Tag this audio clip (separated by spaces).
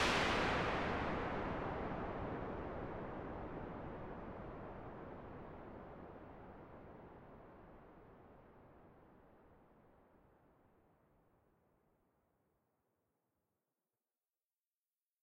buildup crash down drone filter lp noise white Whitenoise